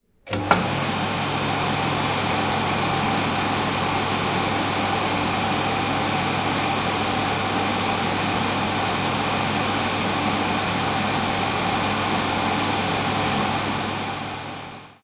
The sound produced by a hands dryer
This sound was recorded at the Campus of Poblenou of the Pompeu Fabra University, in the area of Tallers in men bathroom, corridor A .It was recorded between 14:00-14:20 with a Zoom H2 recorder. The sound consist in a noisy, continuous and high frequency sound produced by the air generated in the dryer.
campus-upf, air, UPF-CS12, hands, dryer, bathroom, bath, noise